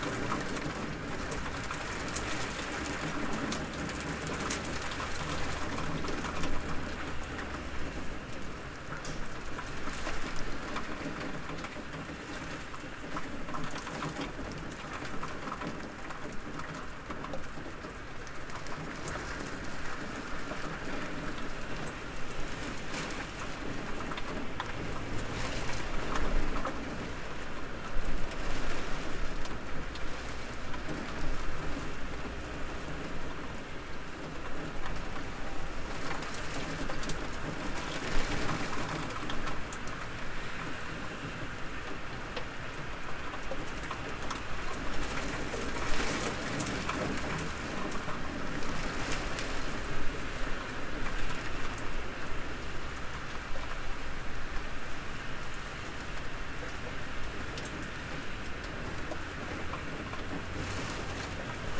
Recorded next to a window, in a seventh floor, with traffic below, while it was raining hard.